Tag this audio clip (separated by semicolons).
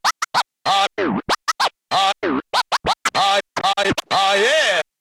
hip; turntablism; hiphop; golden-era; 90s; scratch; classic; vinyl; scratches; acid-sized; hip-hop; scratching; rap; dj; hop; turntable